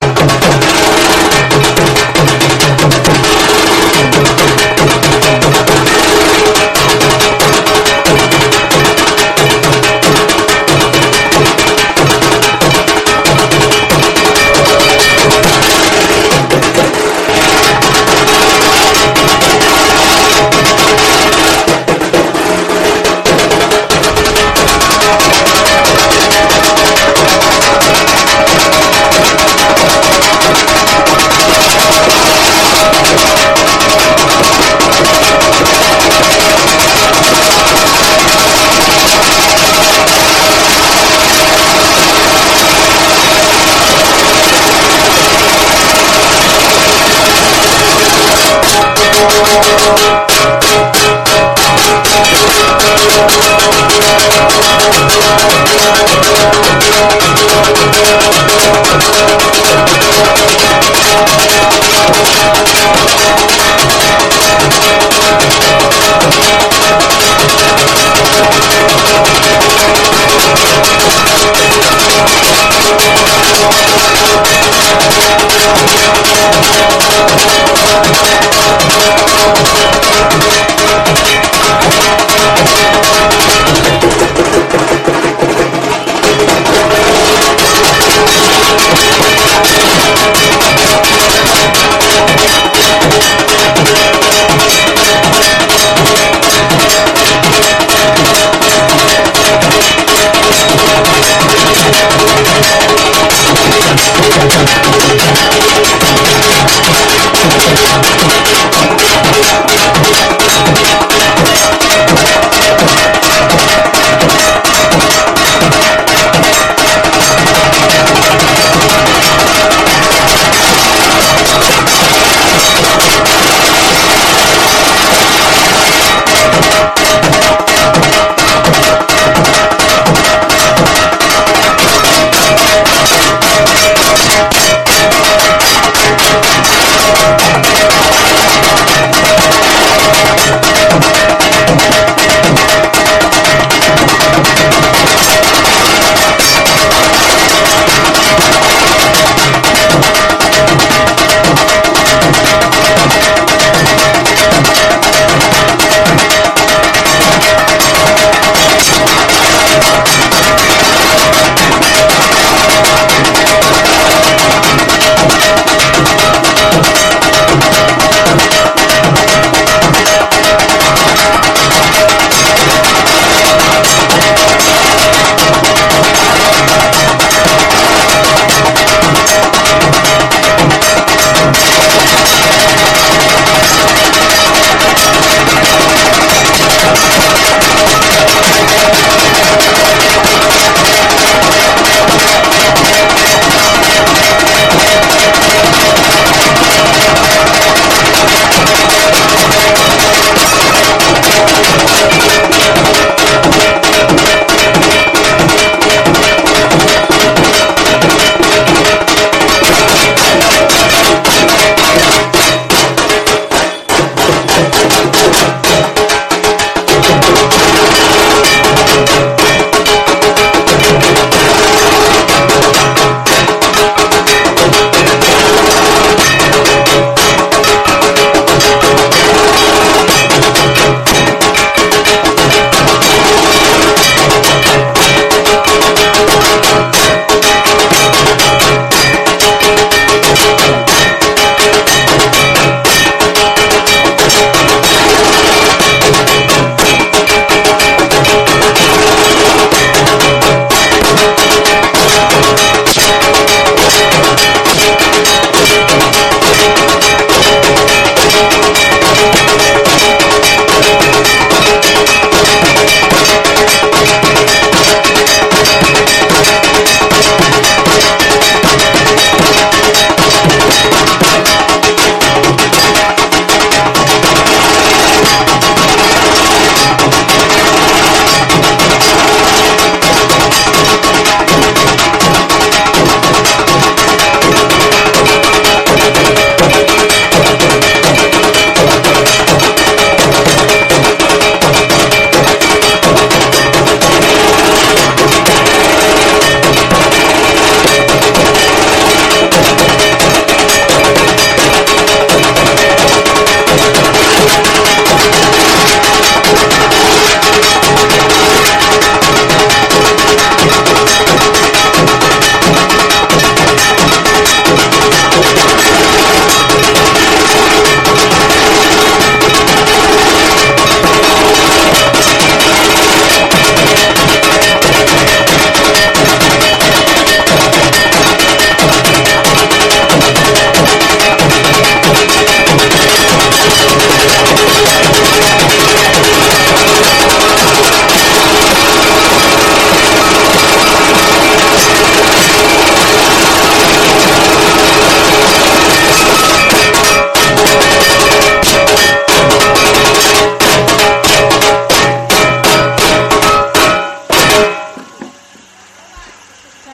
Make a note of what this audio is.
A near-six-minute Durga Pujo dhaak beats performance from the Indian festival, with various tempo and rhythms. Recorded in Kolkata in October 2021 during Dashami, using a Zoom F1 field recorder and shotgun mic. The pitch is a tad loud in patches, so listen to the full audio to select sections that sound best. Troupe of 'dhaakis' (the drum players) are from Baraasat, West Bengal, India.